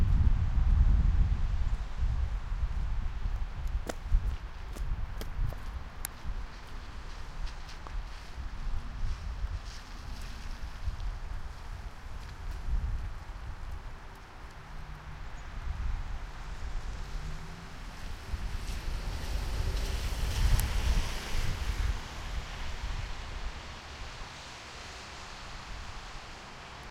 A very large parking lot, a few cars go by and someone walks by.